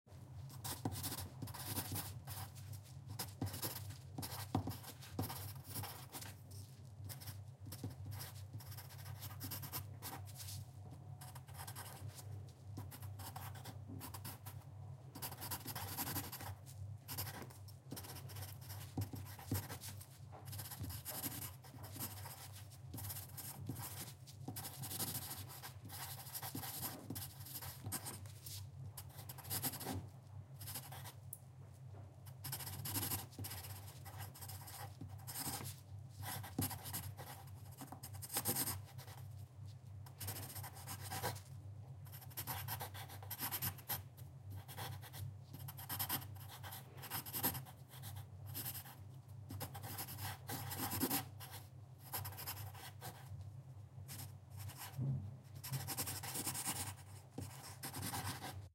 Writing with pencil
draw, drawing, paper, pen, pencil, scribble, scribbling, write, writing